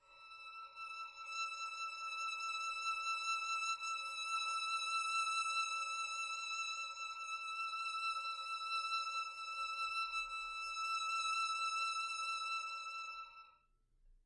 One-shot from Versilian Studios Chamber Orchestra 2: Community Edition sampling project.
Instrument family: Strings
Instrument: Solo Violin
Articulation: vibrato sustain
Note: E6
Midi note: 88
Midi velocity (center): 31
Room type: Livingroom
Microphone: 2x Rode NT1-A spaced pair
Performer: Lily Lyons
vsco-2, vibrato-sustain, e6